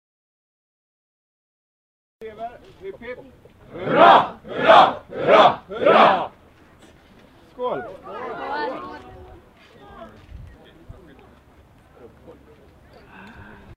Sound is recorded during a wedding party
A, cheers, crowd, field-recording, four, Hurray, people, round, Sweden, times, wedding